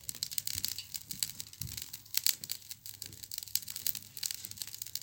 fire crackling loop
A crackling fire, outdoors at night. Recorded on a smart phone and looped in REAPER. Some slight EQ adjustment applies to dampen other sounds.
Have a sound request?
crackle, crackling, fire, flames, loop, night, outdoors, pop, sparks